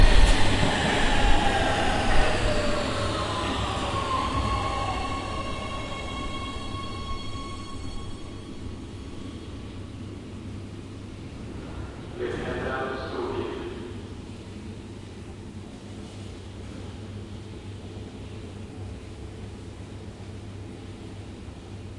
subway arrives (Metro station in Oslo)
metro, rail